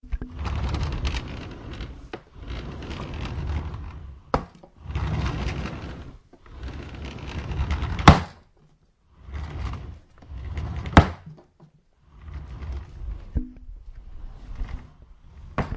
Sonicsnaps LBFR Bahar
rennes, france, labinquenais